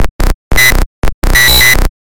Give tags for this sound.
bytebeat ring-tone sonnerie

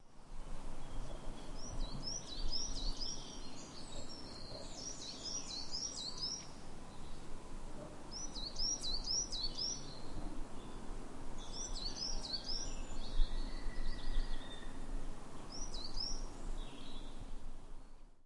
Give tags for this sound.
ambience atmosphere bird bird-song field-recording great-tit green-woodpecker

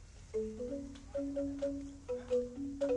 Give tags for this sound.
xylophone bali

